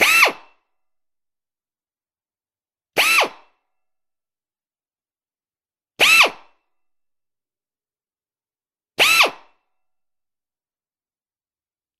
Pneumatic drill - Desoutter - Start 4
Desoutter pneumatic drill started four times.
air-pressure, desoutter